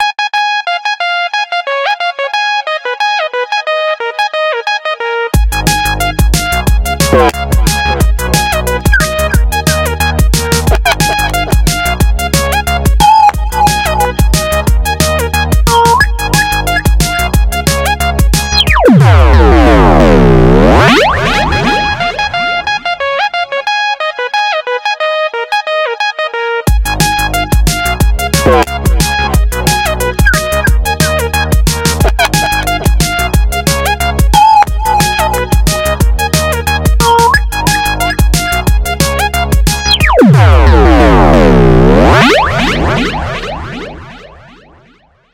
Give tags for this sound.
90bpm; Bb; ringtone

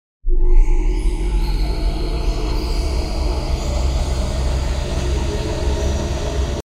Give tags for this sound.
mystic; ambient; mysterious

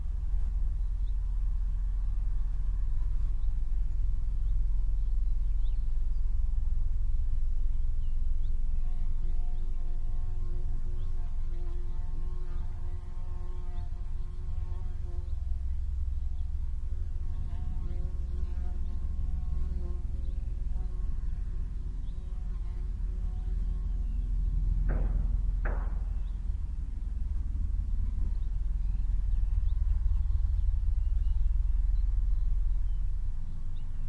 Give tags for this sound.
shootingrange; outside; fieldrecording; ambience; birds; flying; shot; shooting; fly; gunshot; fable; field

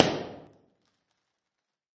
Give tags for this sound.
bang; bounce; crack; knall; pop; puff; smack; smacker; snapper; whang

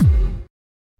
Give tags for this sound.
bass; bassd; bass-drum; bassdrum; deep; drum; floor; hard; kick; kickdrum; kicks; layered; low; processed; synthetic

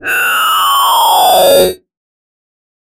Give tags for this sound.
analog; fm

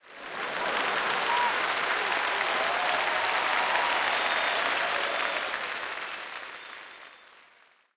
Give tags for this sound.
applause; crowd; theatre

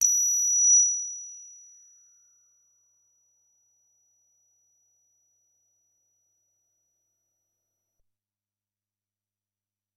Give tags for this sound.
ddrm; midi-velocity-127; multisample

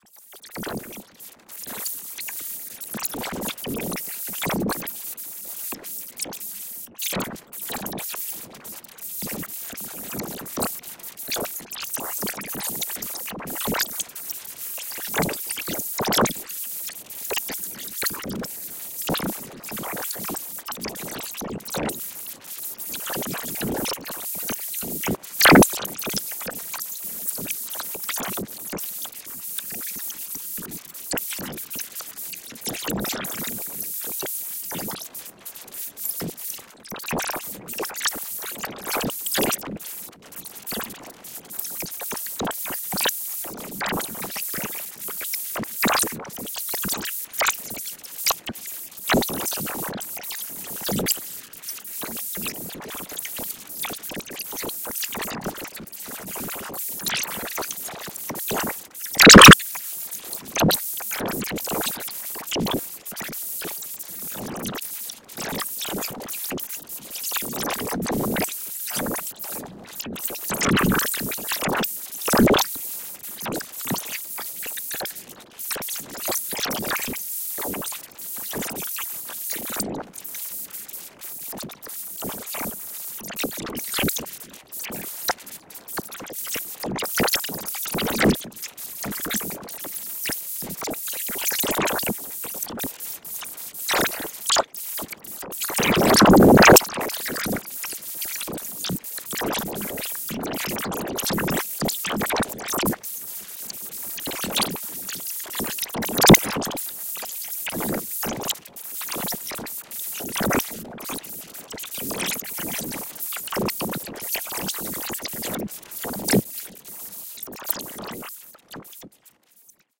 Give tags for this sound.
ambient; drone; reaktor; soundscape; wind

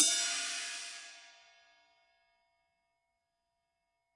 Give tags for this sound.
hi-hat; multisample; cymbal; 1-shot; velocity

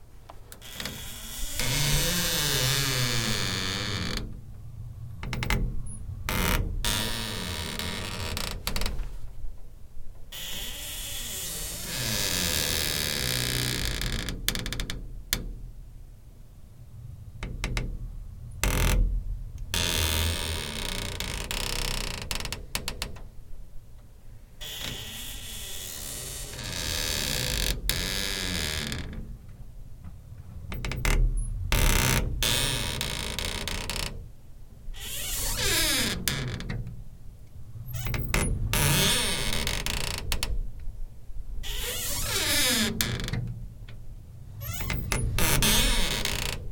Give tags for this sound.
creaking; door; popping; squeak

